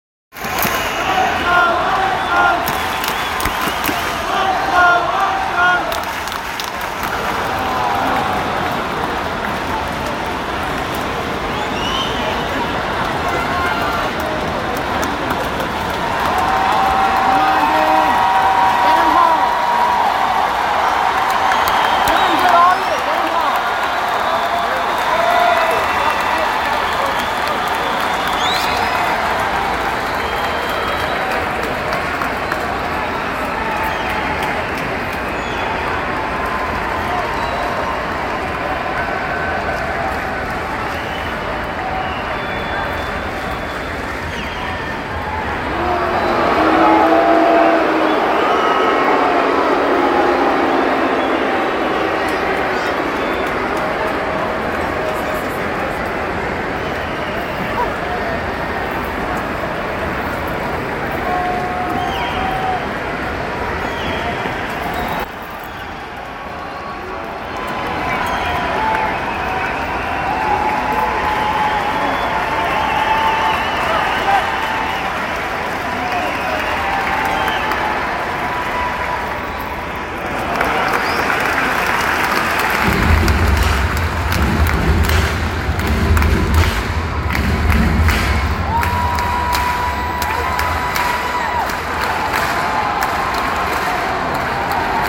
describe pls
Chants, cheers, and boos at a baseball game
Fans chanting, cheering, and booing at a Houston Astros playoff game
Baseball, boos, cheering, Houston-Astros